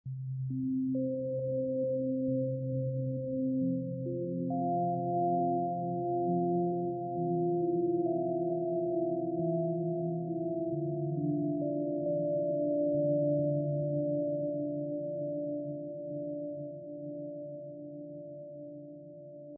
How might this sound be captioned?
MH-Arp(String)
arpeggio, synth, ambient, space, string, loop